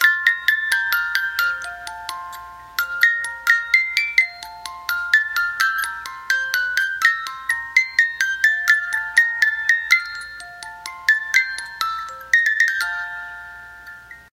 Dancing Ducks Music Box
This music box was once in my nursery as a baby. This is an edited recording of the song playing once and looping perfectly. I also have one of me winding it up and letting it play through. In the key of F major.